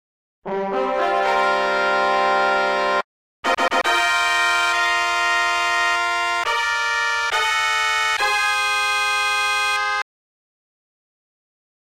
An opening trumpet tune made in LMMS. Made it to be used for my D&D campaign, and with a little editing it can be made to sound like it's in an arena.
announcement, royal